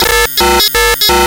Computer console processing